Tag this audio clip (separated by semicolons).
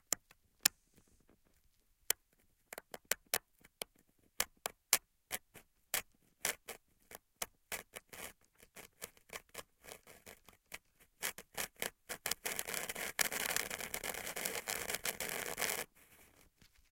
Grattements; piezo; r